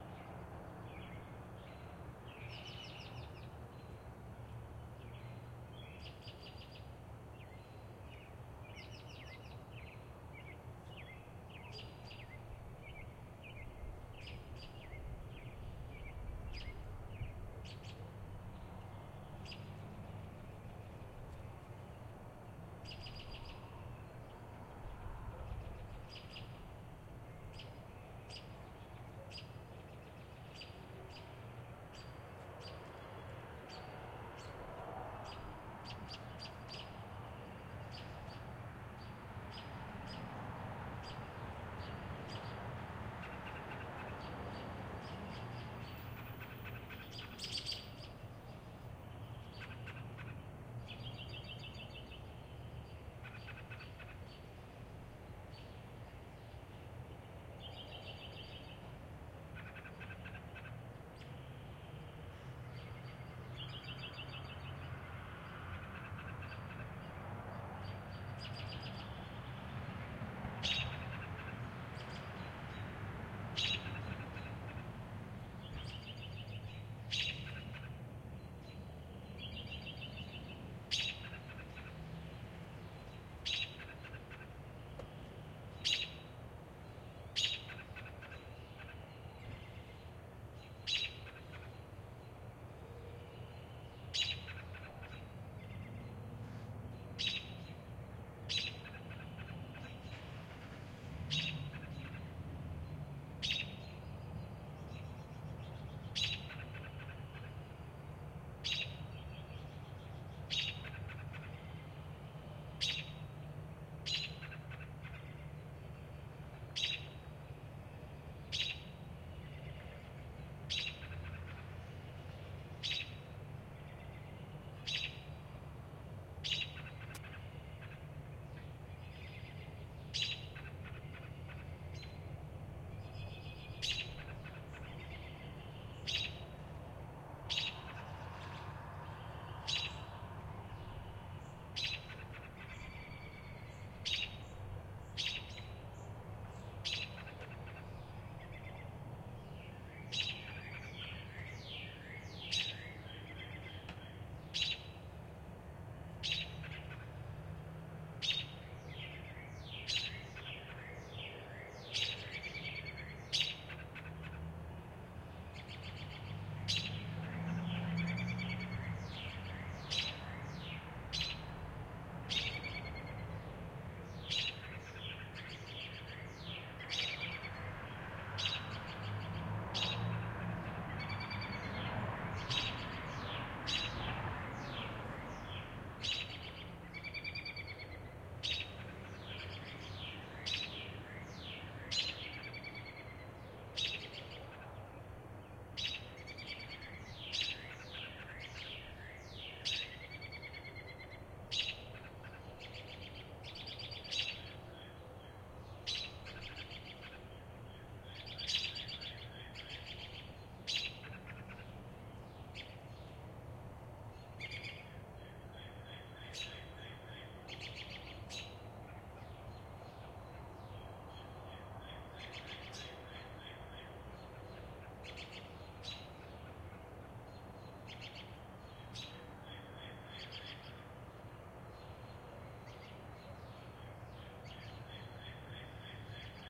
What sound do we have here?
LateWinterCOLDAMBRobinsWakingUpMarch13th2018
That interesting time of year between Winter and Spring. Actually, here in the Northern Hemisphere Winter can still be strongly felt...the outside temp. when this recording was done was a very COLD 30 degrees F.
BUT, as you can hear, the Main players in this small-town soundscape are the American Robins (Turdus Migratorious) who are busy vocalizing and setting up their territory. Despite the cold, these Summer residents don't seem to let that get in their way.
Recording made at 6:30AM on Tuesday March 13th, 2018 in the backyard of a home in a small town in Illinois.
Equipment used: Sound Devices 702. Microphone: Audio-Technica BP4025 STEREO MICROPHONE. No processing or post-tweaking at all.
Enjoy this soundscape of the annual transition/battle between Winter, sensing it's doom, and the inevitable Spring on our Planet Earth.
Early-Spring, Robins, Small-town, Turdus-Migratorious, Cold